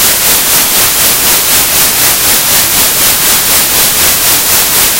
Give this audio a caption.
andrea bonin03
bruit blanc avec trémolo
typlogie: impulsion complexe
morphologie:
son seul complexe
grain, frémissant
allure mécanique, ordre
attaque abrupte